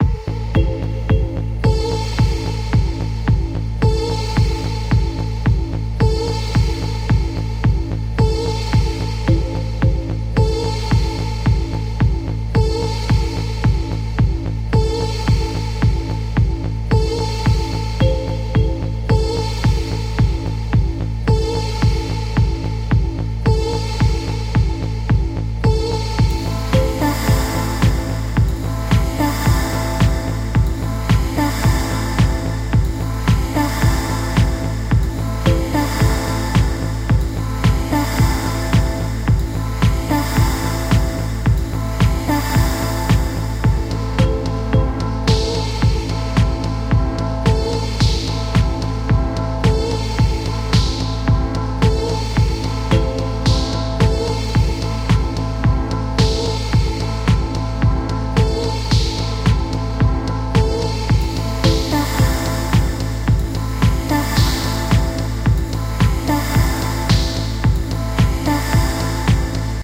"Level2" Track loop
Synths:Ableton live,Slenth1,Bizune.